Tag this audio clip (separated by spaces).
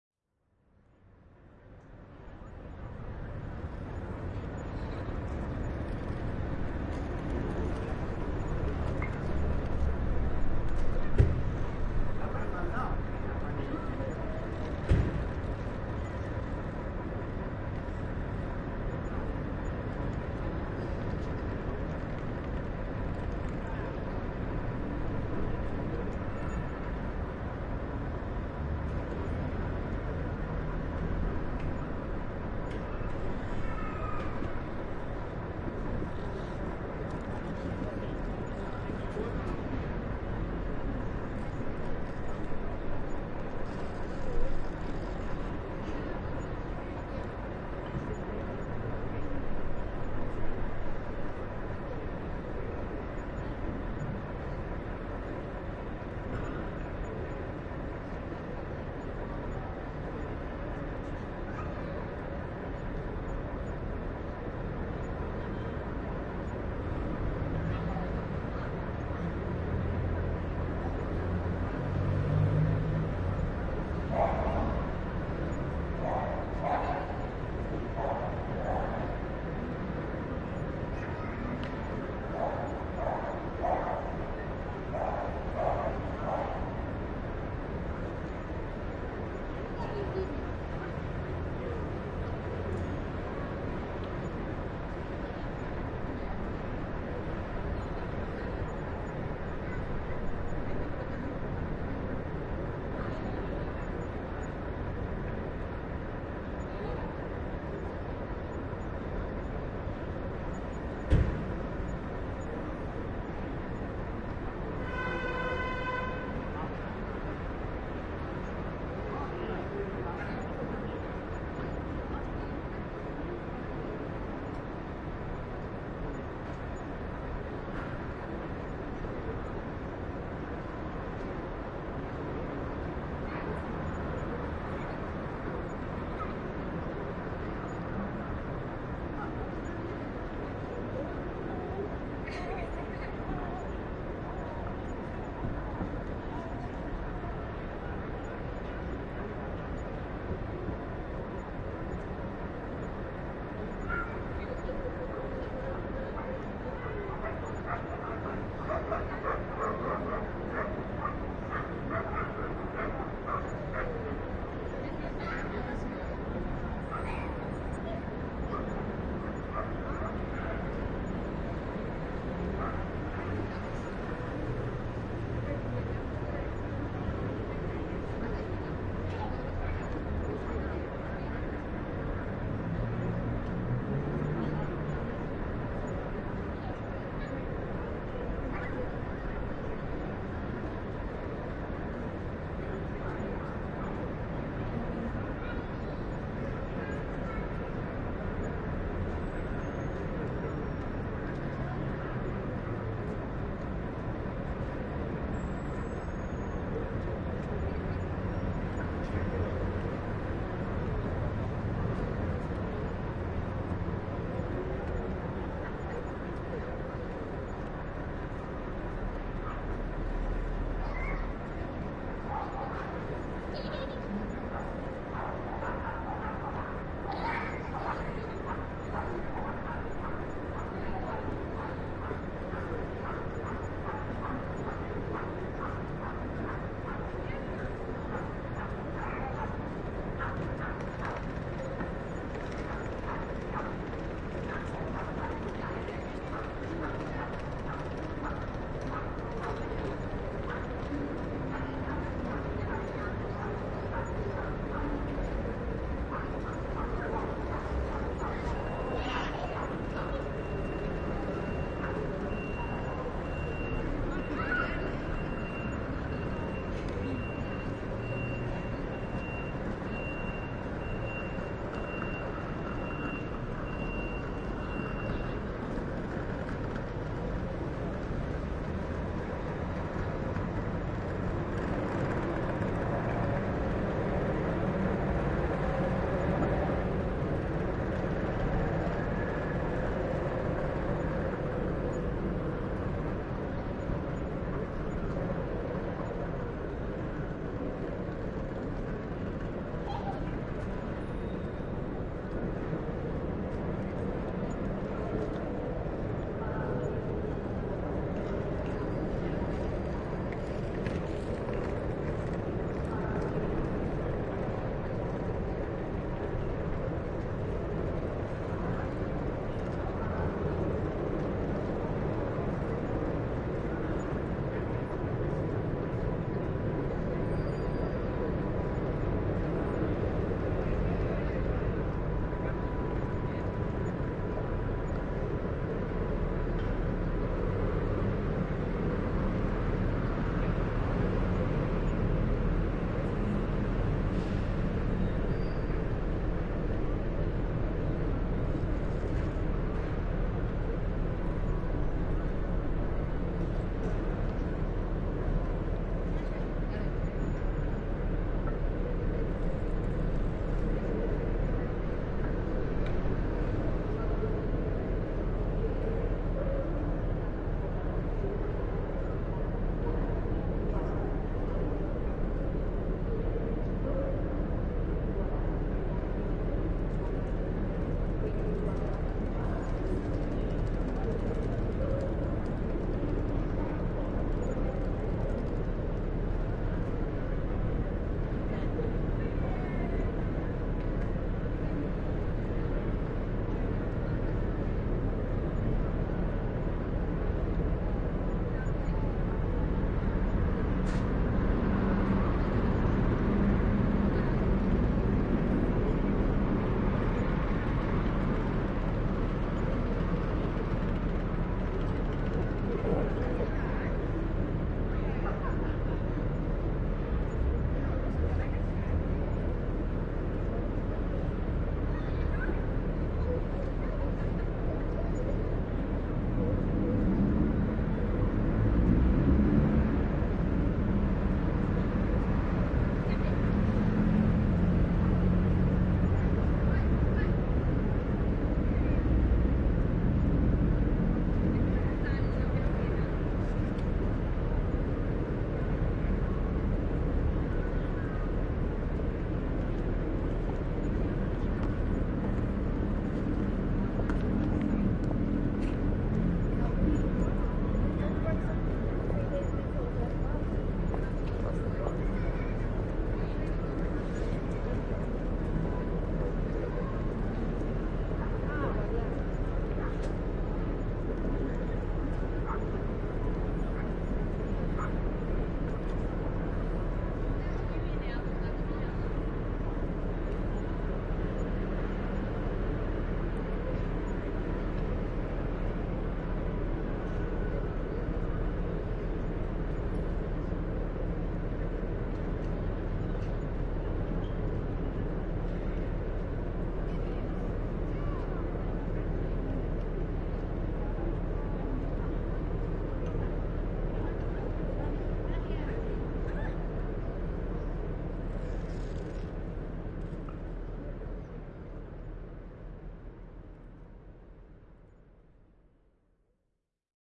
bus station field-recording catalunya barcelona catalonia